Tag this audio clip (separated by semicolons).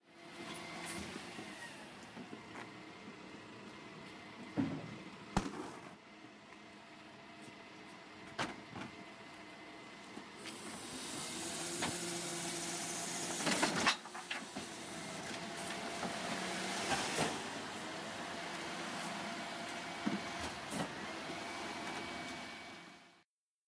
rubbish; waste; trash; recycling; bin; lorry; garbage; collection; rubish; mechanism; mechanical; vehicle; truck